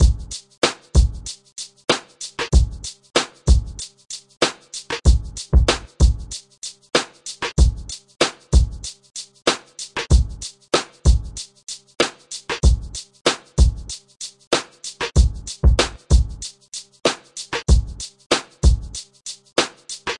Hip Hop Drum Loop 03
Great for Hip Hop music producers.
loop, drum, sample, hip, beat, hop